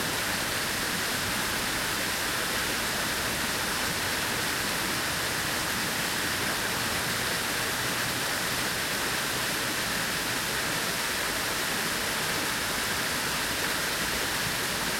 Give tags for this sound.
waterfall; field-recording; water; river; current; melt-water; stream

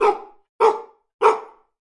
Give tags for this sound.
anger; angry; animal; animals; bark; barking; dog; dogs; growl; growling; pet; pets

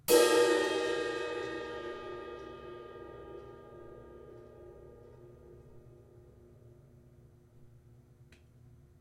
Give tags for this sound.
cymbal; drum; kit

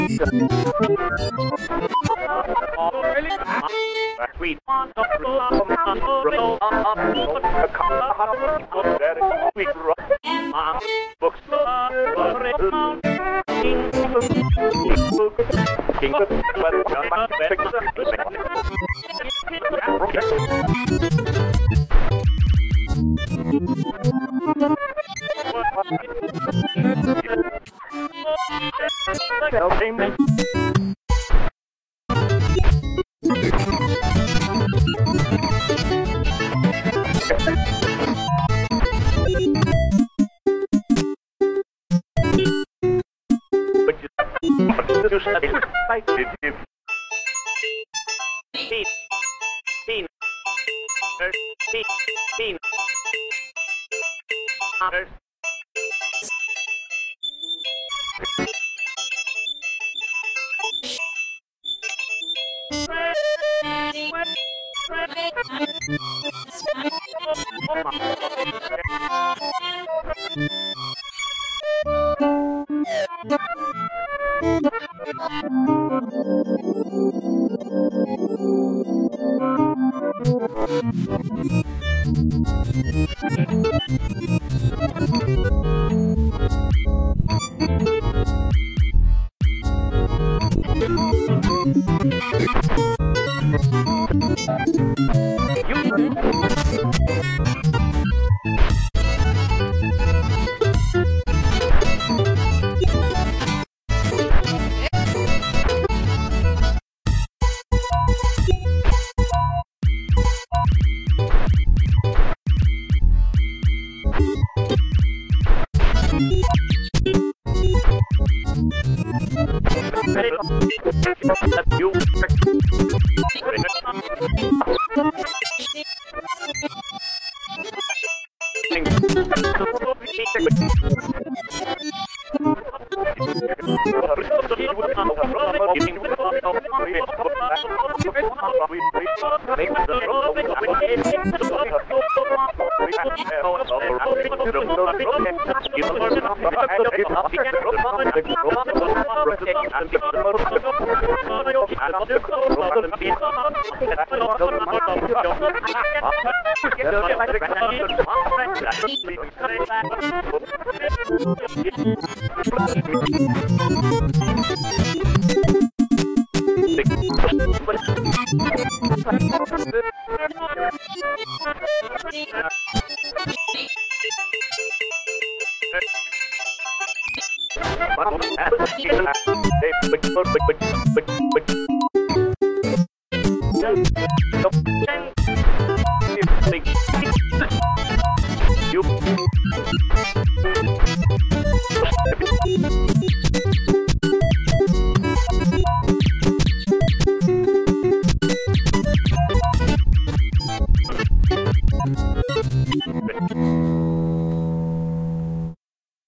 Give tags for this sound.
tuning; chaotic; radio-tuning; signal; weird; extreme; noise; glitch; experimental; sound-design; processed; electronic

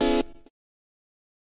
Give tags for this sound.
techno; stab

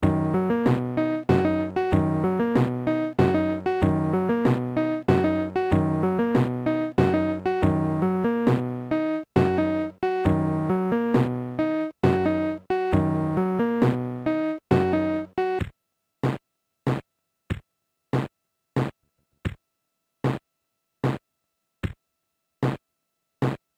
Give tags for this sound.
accompaniment; auto-play; casiotone; electronic; lo-fi; waltz

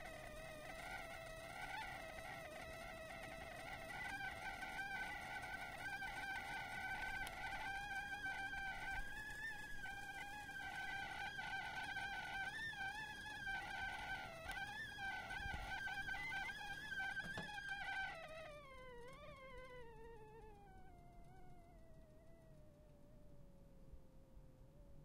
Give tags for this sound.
steam; teapot

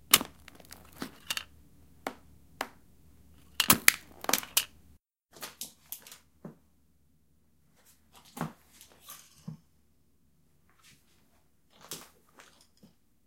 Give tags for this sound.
accidental; crush; destruction; Eye-glasses; foley; foot-step; indoors; lens; metal; plastic; smash

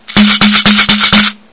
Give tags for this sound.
drum; gourd; handmade; invented-instrument; shaker

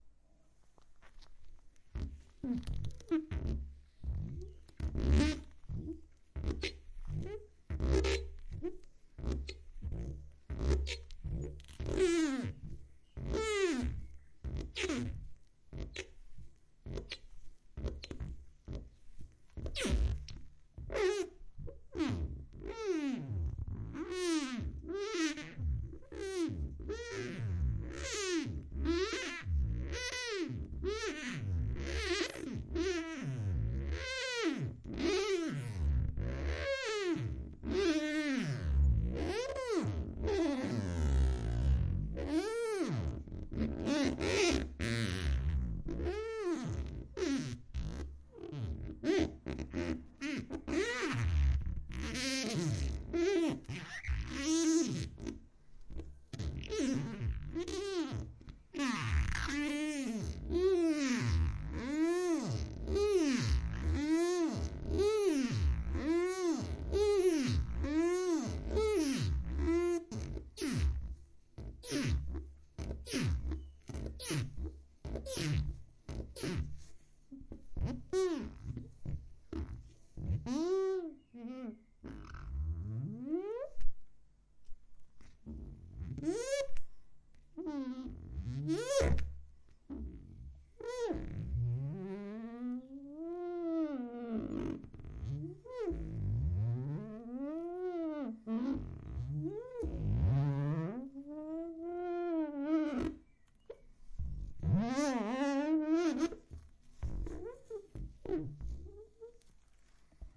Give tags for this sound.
sponge; squeaky; glass

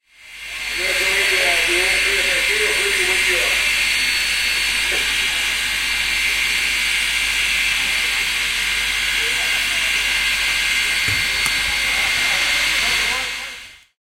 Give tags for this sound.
steam-train; locomotive; new-zealand

